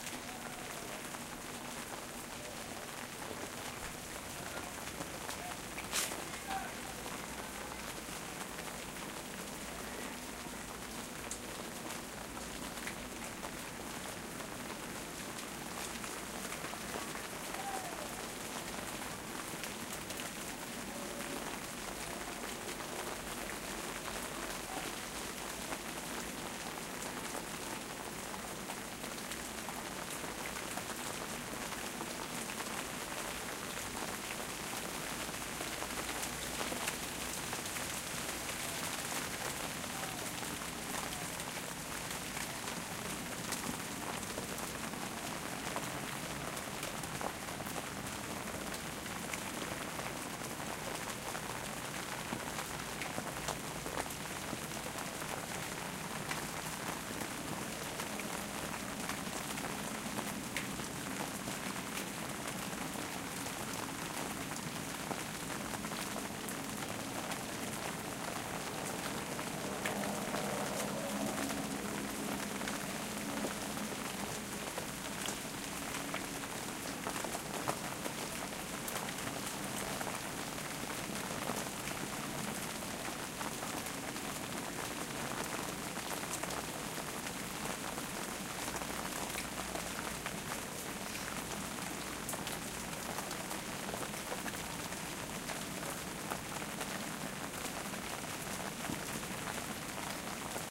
AMB RAIN Umbrella Close urban 00
Rain in the city. Field recording of urban rain under an umbrella, constant drops, some distant cars and city rumble.
Recorded with a pair of Neumann KM 184 into Aeta Mixy/Mbox
Recorded in 2008.
ambiance, field, france, pluie, rain, recording, surburban, urban, vent